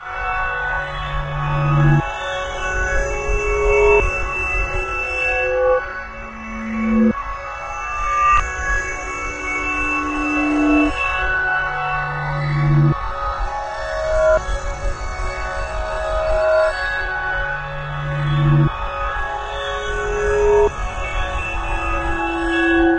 Ambient Horror Loop
I remade it with Audiacity and this is the result.
Loop, Scary, Ambient, Horror